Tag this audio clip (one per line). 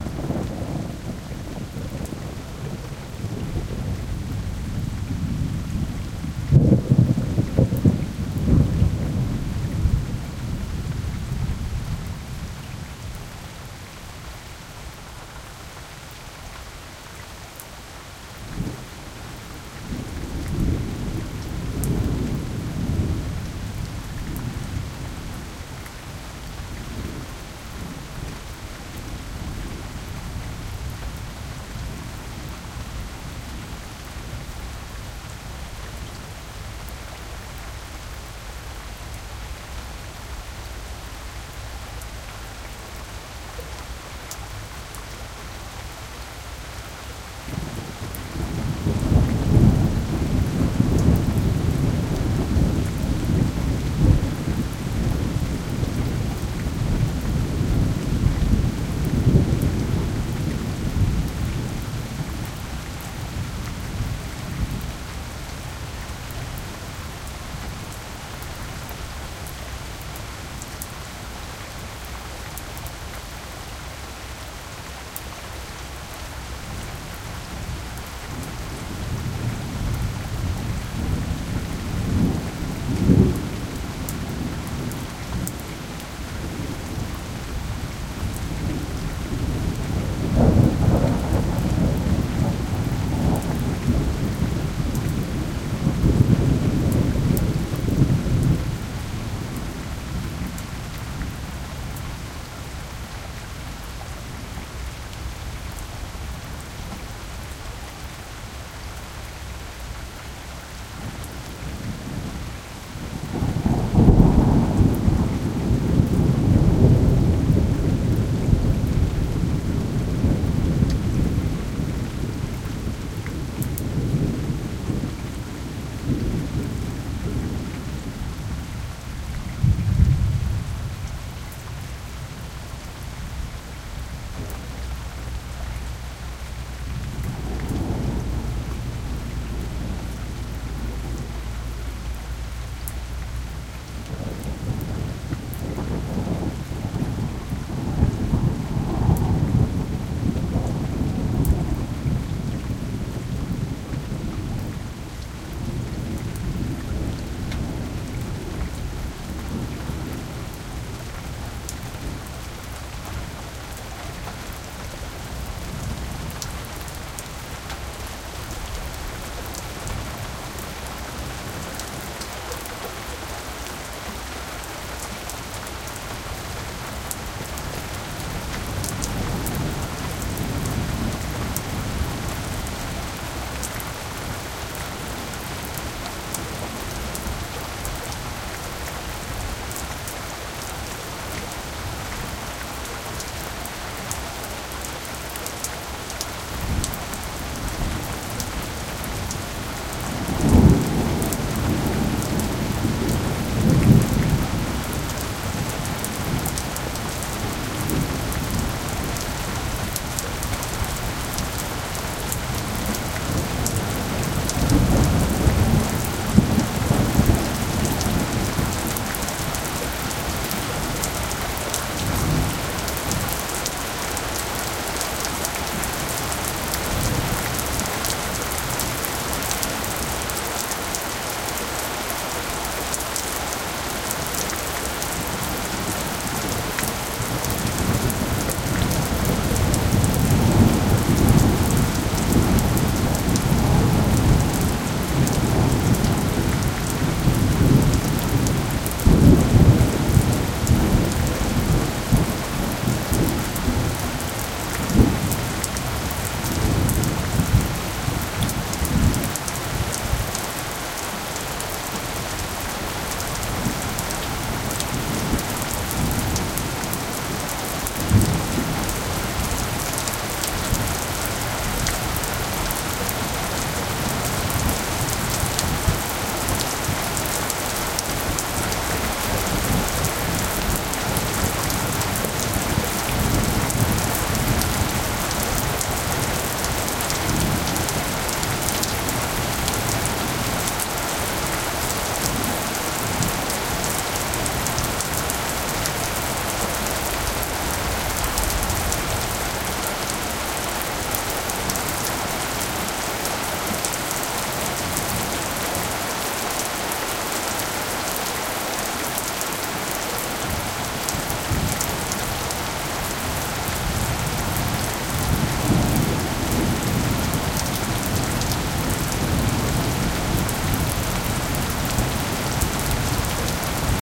ambience
field-recording
lightning
nature
purist
rain
rumble
storm
thunder
thunderstorm
weather